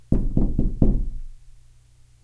Four somewhat soft knocks on a small wooden door. Something to note, the door rattles a lot, thus making it sound as if I am knocking quite loudly on it.